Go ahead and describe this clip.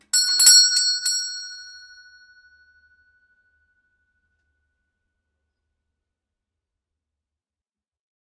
FX Doorbell Pull without pull Store Bell 04
Old fashioned doorbell pulled with lever, recorded in old house from 1890
Doorbell, Store, bell